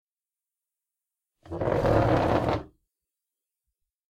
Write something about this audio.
15 odsunuti zidle
Move the chair.
skola, push, school, odsunout, move, pushing, posunout, classroom, class, chair, zidle